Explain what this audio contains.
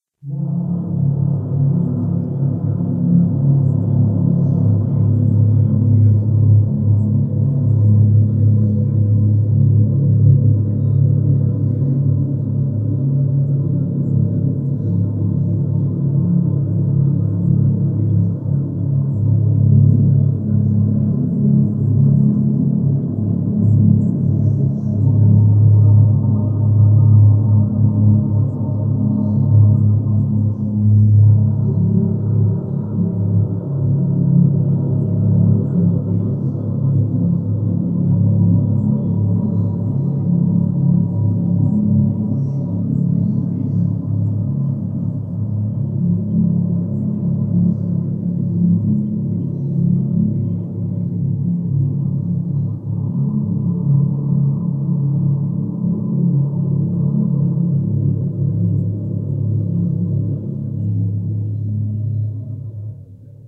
A mysterious soundscape with low, watery tones and flashes of whispering above it.